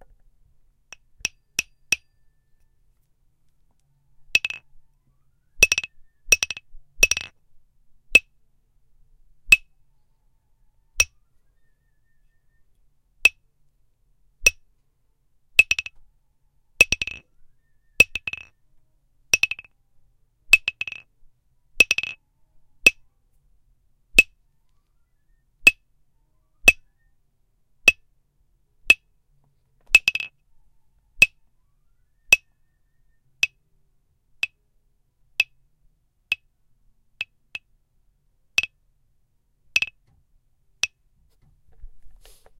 A small wood block hit with a hard plastic mallet. Creates a very simple, high-pitched dry percussive sound.
delicate, wood, wooden, simple, percussion, block, hit